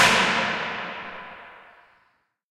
Aigu loin short04
Stereo ORTF recorded with a pair of AKG C451B and a Zoom H4.
It was recorded hitting different metal stuffs in the abandoned Staub Factory in France.
This is part of a pack entirely cleaned and mastered.
drum, field-recording, hit, industrial, metal, metallic, percussion, percussive, staub